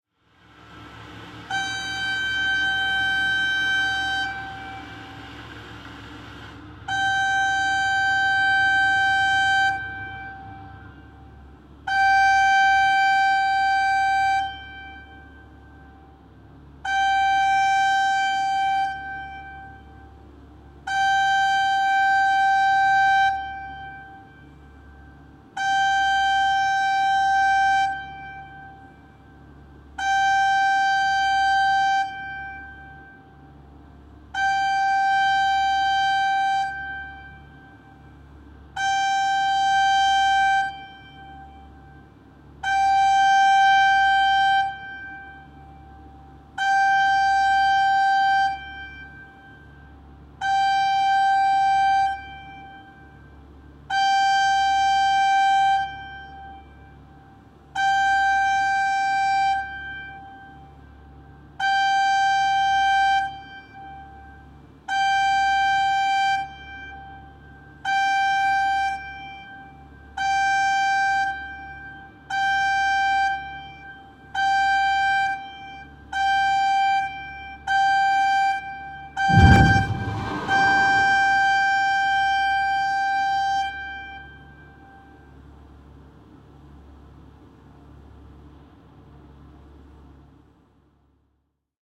Räjähdys, varoitussireeni / Explosion and a warning siren at a construction site, recorded inside
Räjähdys ja sireeni rakennustyömaalla. Äänitetty sisällä.
Äänitetty / Rec: Zoom H2, internal mic
Paikka/Place: Suomi / Finland / Helsinki
Aika/Date: 25.01.2017
Suomi, Finnish-Broadcasting-Company, Soundfx, Interior, Yle, Warning-siren